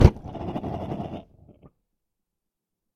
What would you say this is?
Gas furnace - Ignition tired
Gas furnace is ignited and starts to burn and sounds weary.